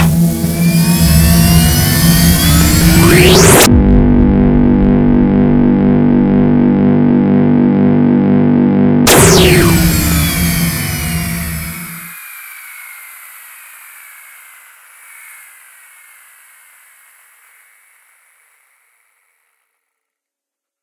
Hyperdrive Sound Effect 1
Basically a spaceship hyperdrive/warp drive engine or whatever. Includes spin-up, engine drone, and spin-down. I took these, and combined them with some sounds I made in Audacity, and made what you have here.
hyperdrive, space